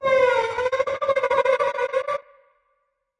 An effected violin.